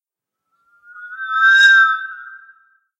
Another magic sound effect, this time inspired by the sound commonly heard in the third installment of a popular film series based on a book series about a boy who lives in a cupboard. I assume that sound was made with a waterphone, but for lack of half a million dollars I settled for half a can of deodorant and a pair of nail clippers.